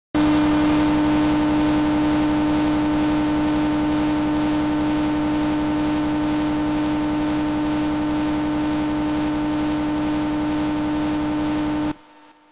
Ping pong saw
Made on a Waldorf Q rack
pingpong, sawwave, synthesizer, waldorf